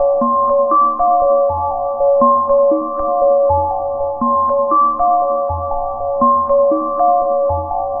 ambienta-soundtrack FM-Retweek miramba-dreamer 120
using as a 'dreaming sound' in the "Ambienta" main theme soundtrack. the performance will take place next 2 august in Piazza Sirena, Francavilla al mare (Italy).
sequence, scoring, cinematic, loop, key, arp, arpeggio, sweet, float, kids, dreaming, film, synth, theatre, dream, contemporary, ambience, theme, atmosphere, synthesizer, jingle, vibes, chimes, mallet